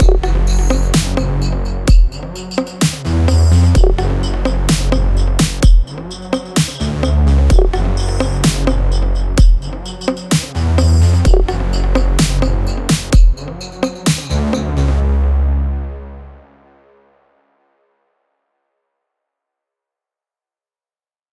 Blasting brass entry makes sound even powerful
Bass, Beat, Clap, electronic, Kick, Loop, Melody, Music, Rhythm, Saw, Snare